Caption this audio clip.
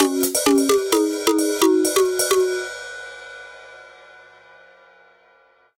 Klasky-Csupoesque Beat (130BPM 11 8) Pattern 010m (with Drums)
Short, single bar loops that use a unique tuning system (that I have described below). The end result of the tuning system, the timbre of the instrument, and the odd time signature (11/8) resulted in a sort of Rugrats-esque vibe. I named the pack based on the creators of Rugrats (Klasky-Csupo). The music has a similar sound, but it's definitely it's own entity.
There are sixteen basic progressions without drums and each particular pattern has subvariants with varying drum patterns.
What was used:
FL Studio 21
VST: Sytrus "Ethnic Hit"
FPC: Jayce Lewis Direct In
Tuning System: Dwarf Scale 11 <3>
Instead, the scale used is actually just-intoned (JI) meaning that simple ratios are used in lieu of using various roots of some interval (in the case of 12 tone temperament, each step is equal to the twelfth root of 2, then you take that number and you multiply that value by the frequency of a given note and it generates the next note above it).